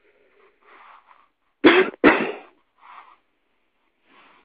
coughing in bed (early morning January 16th of 2009)